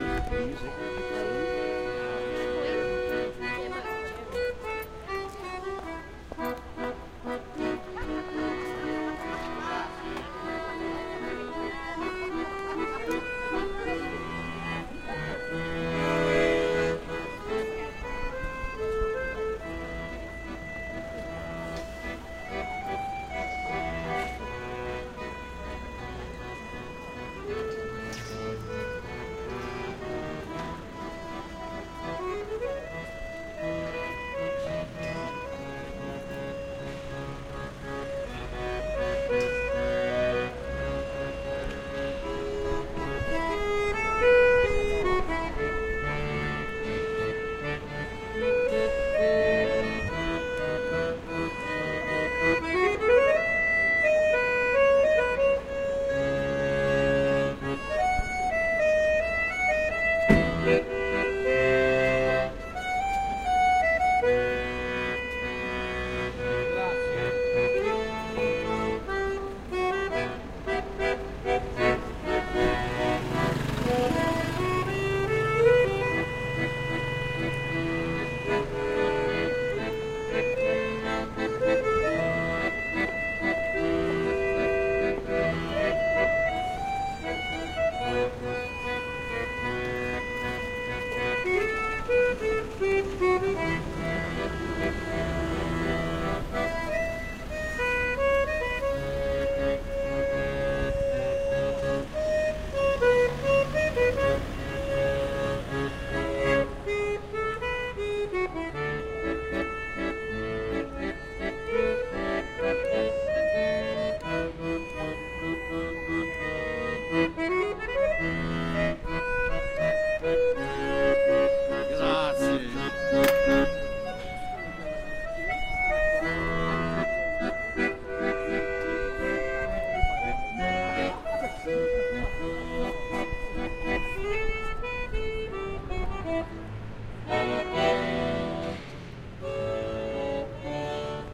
An Italian street performer playing the accordion. Various street noise in the background.